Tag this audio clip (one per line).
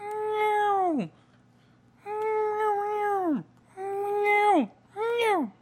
cat
animal